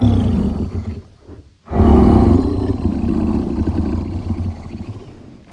Sleeping Monster
Scream, Horror, Breath, Growl, Large, Wild, Roar